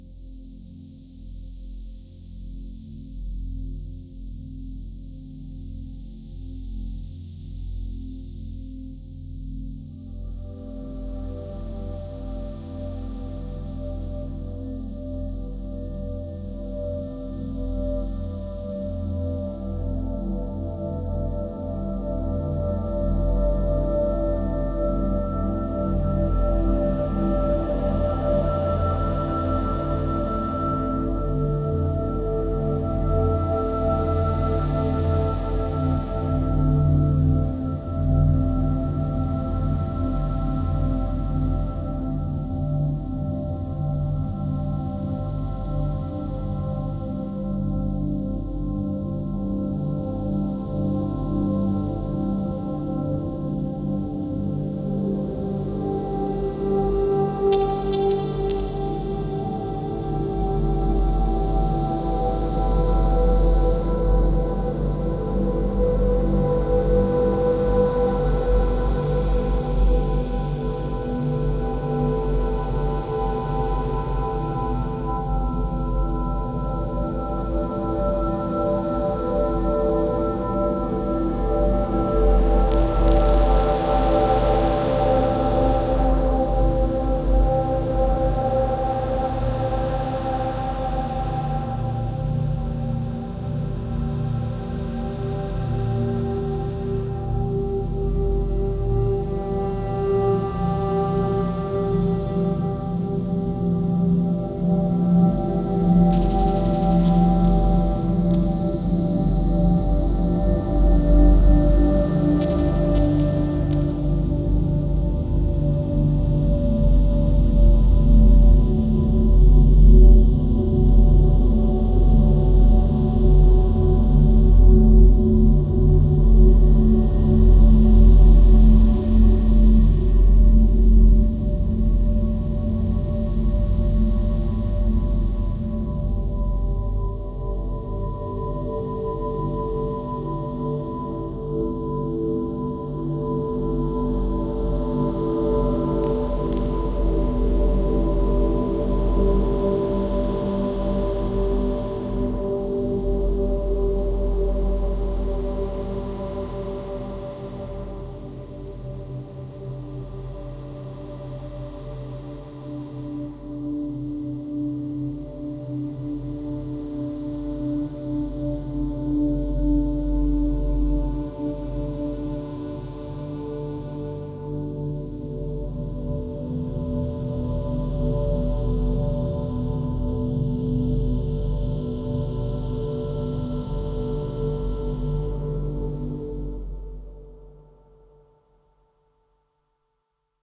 Transitional pad sound created through piano recording and stretched.
Vst effects added on top to add more surreal ambiance.
For more similar sounds visit my old account. Cheers/Bless
Dreamscape, Wave, soundscape, ambient, ambiance, Elementary, vst